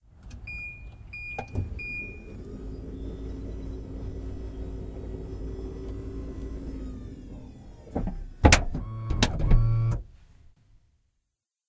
closing tailgate
sound of automatic minivan tailgate closing